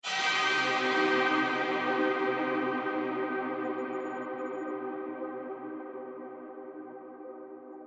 Rave Pad Atmosphere Stab C
A deep atmospheric synth sound useful for providing depth